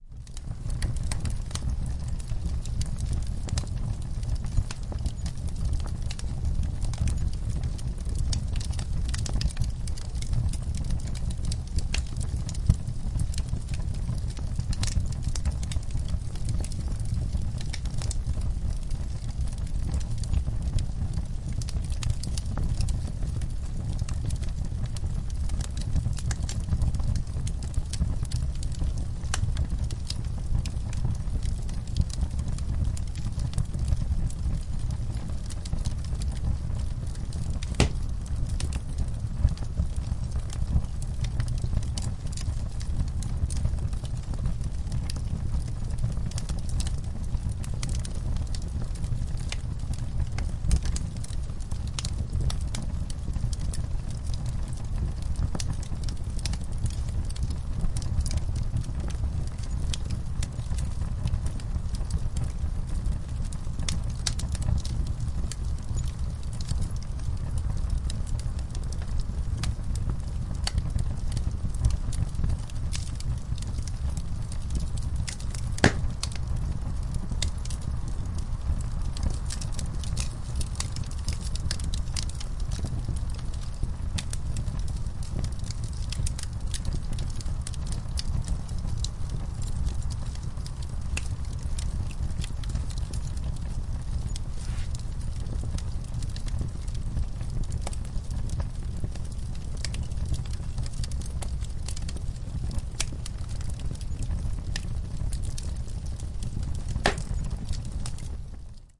Lit Fireplace
A lit sauna fireplace.
burn, burning, crackle, fire, fireplace, flame, flames, sauna